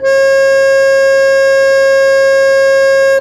single notes from the cheap plastic wind organ